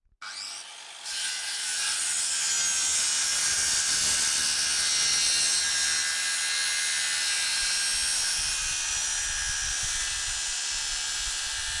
04-4 Orbital Saw
CZ, Czech, orbital-saw, Panska